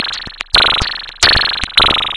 110 bpm FM Rhythm -27
A rhythmic loop created with an ensemble from the Reaktor
User Library. This loop has a nice electro feel and the typical higher
frequency bell like content of frequency modulation. An experimental
loop with some electronic bubbles in it. The tempo is 110 bpm and it lasts 1 measure 4/4. Mastered within Cubase SX and Wavelab using several plugins.
electronic, fm, rhythmic, loop, 110-bpm